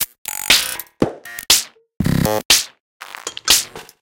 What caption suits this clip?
SnaredArtifacts 120bpm03 LoopCache AbstractPercussion
Abstract Percussion Loop made from field recorded found sounds
Loop, Abstract, Percussion